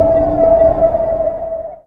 Analogue synth sonar soundPart of my Jen SX1000 samples
analogue, sx1000